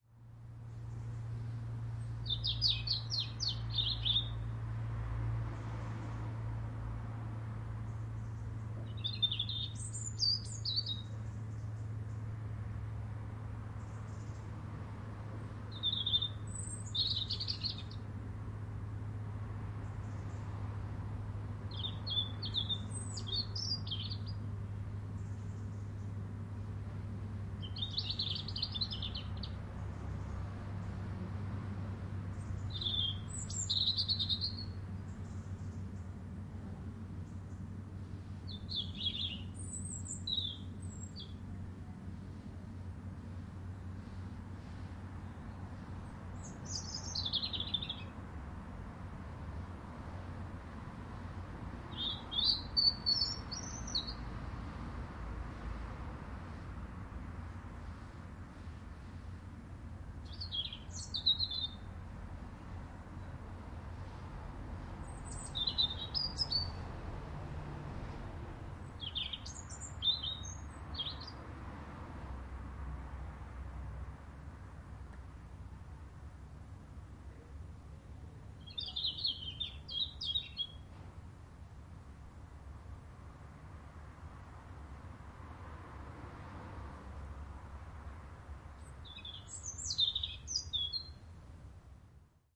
Summer city birdsong
Recorded a few miles outside of Southampton, UK, very early in the morning on a Zoom H1, internal mics.
ambient, birdsong, city, distant, field-recording, morning, nature, summer, traffic, very, zoom